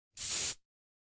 single-deo-spray-stero
A single spray from a deodorant bottle (ZOOM H6)
antiperspirant, can, Deo, deodorant, Deospray, spray, spraying